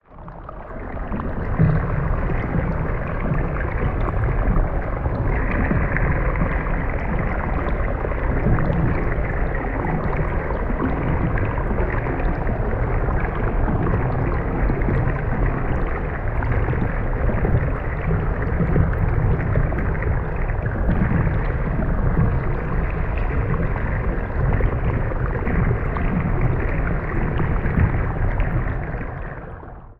10 Stream, Hidden Canal, Spacious, Trickling, Drips, Noises, Flowing, Underwater, Dive Deep 2 Freebie
Enjoy my new generation of udnerwater ambiences. Will be happy for any feedback.
Check the full collection here: